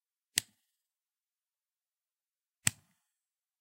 SMALL SIZE TOGGLE SWITCH
A small toggle switch, on and off.
switches, click, toggle, electricity, switch, domesticclunk, small